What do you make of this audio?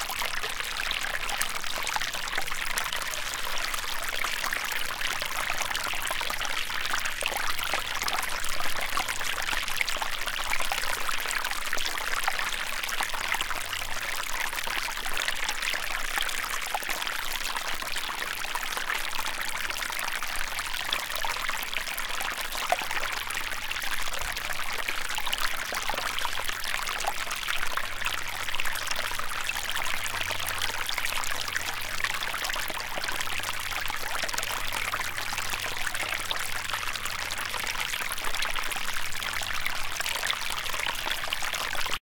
broken top creek 08
One in a series of small streams I recorded while backpacking for a few days around a volcano known as Broken Top in central Oregon. Each one has a somewhat unique character and came from small un-named streams or creeks, so the filename is simply organizational. There has been minimal editing, only some cuts to remove handling noise or wind. Recorded with an AT4021 mic into a modified Marantz PMD 661.
ambient, babbling, brook, creek, field-recording, gurgle, liquid, relaxing, river, splash, stream, trickle, water